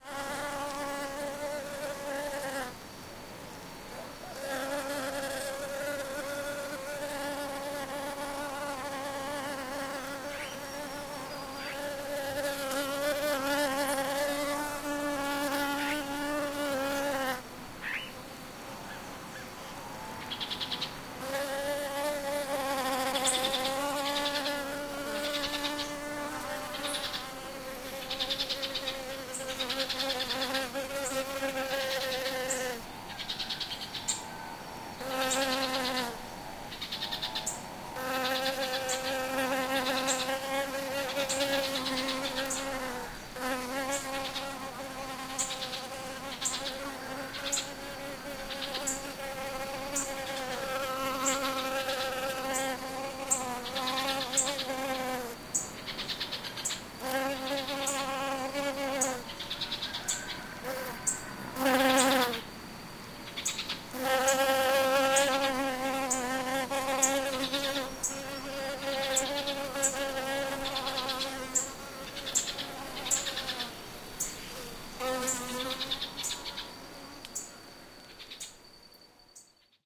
Bees on the grass on early morning.
belo-horizonte, forest, field-recording, countryside, morning, bird, brazil, bees, brasil, tangara